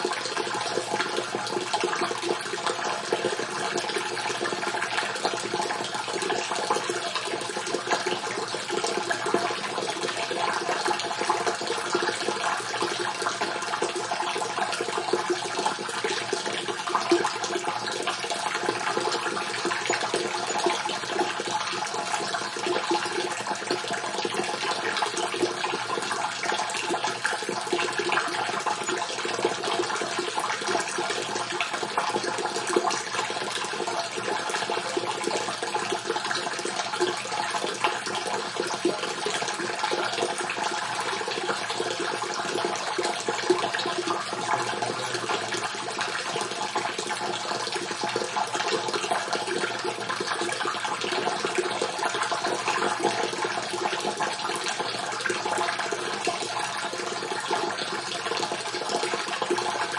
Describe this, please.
Water falling in a half-empty, reverberant tank. Primo EM172 capsules into FEL Microphone Amplifier BMA2, PCM-M10 recorder. Recorded near Ladrillar (Caceres, Spain)